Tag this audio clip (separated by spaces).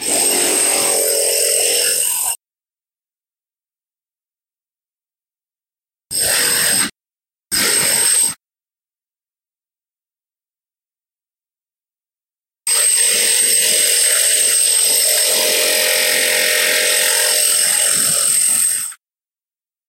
construction harsh